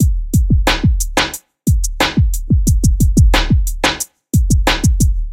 beat1 90BPM
interlude, intro, pattern, sound, stabs, sample, jingle, stereo, music, loop, part, club, pbm, podcast, radio, dancing, drop, dance